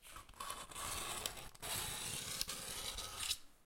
Queneau frot circul 03
frottement réalisé avec une regle sur surface rugeuse
clang, cycle, frottement, metal, metallic, piezo, rattle, steel